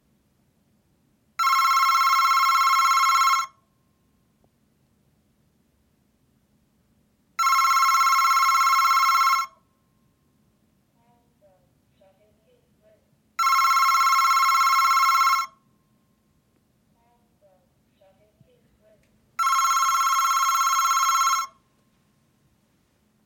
Electronic telephone ring, close
Cordless electronic telephone ringing, close perspective